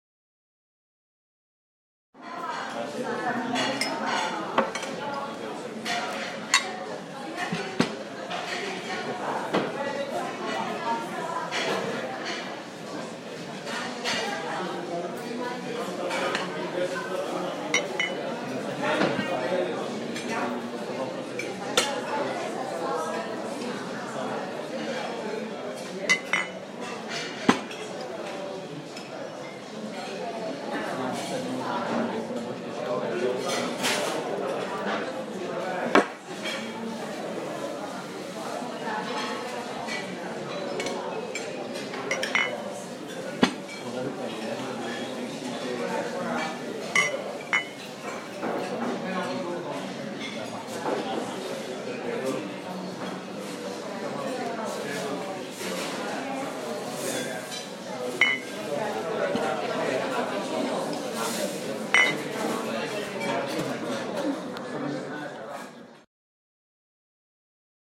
ambient pub
ambient of pub
ambient, beer, pub